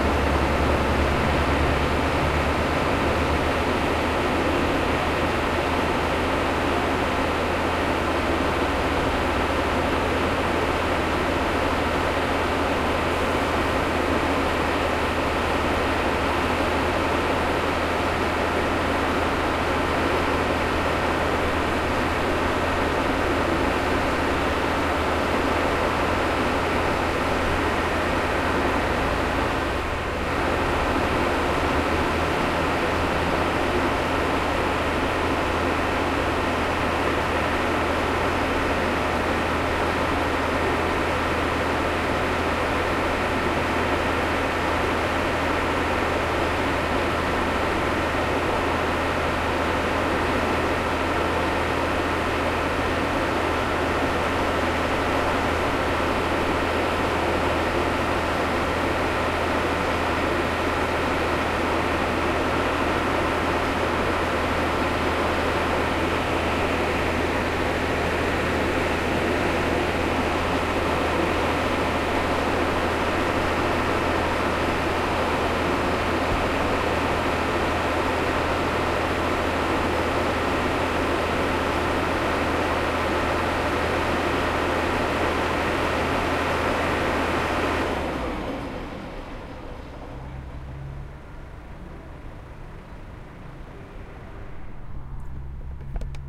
Steal Works, Industrial Pump
A water pump at work at a steel mill.
Large quantities of water are being pumped out of a part of the factory after heavy rain flooded the complex.
Recorder used: Zoom H4N Pro, microphones set at 120 degrees.
motor, factory, Steel-works, water-pump, industry, pump, noise, mechanical, industrial, flooded, engine, field-recording, outdoors, machinery, hum, machine